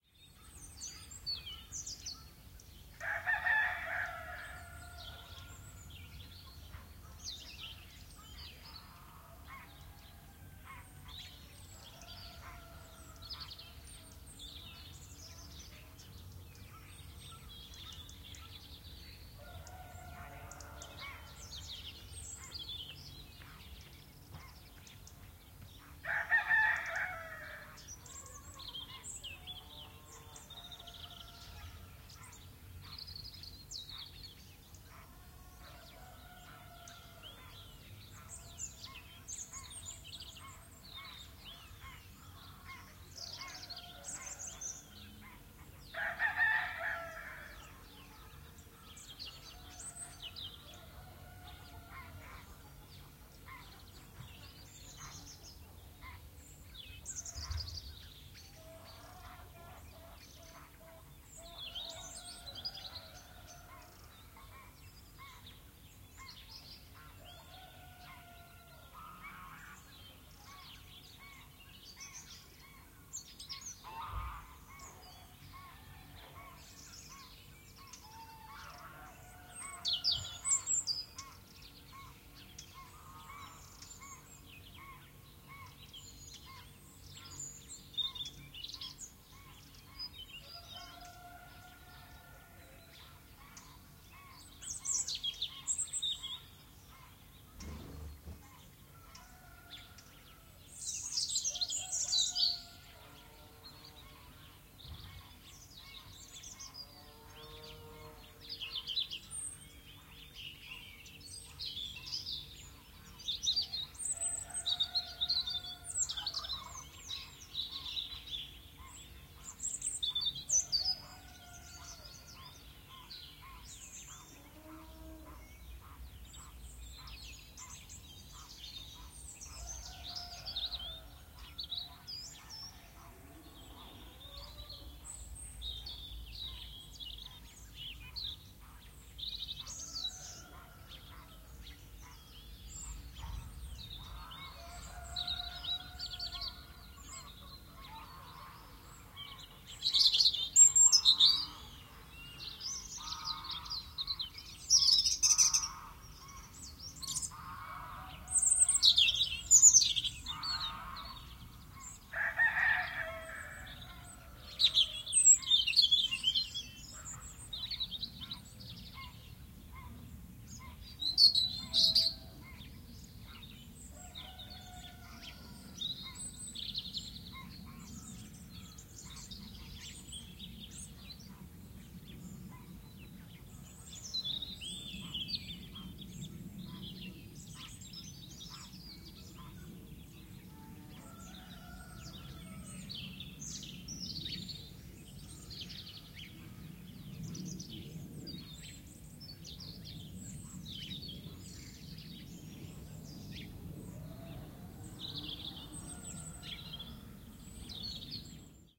amb - outdoor rooster birds
ambiance, ambience, ambient, background-sound, birds, farm, field-recording, forest, galiza, nature, rooster, soundscape